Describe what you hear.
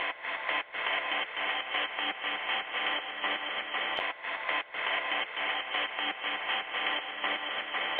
120 Sneaked Up Finally
Playing with Guitar Rig and some more FX, finally looped and even more treatment within Peak
distorted, processed, lofi, bandpassed, loop, bpm, 120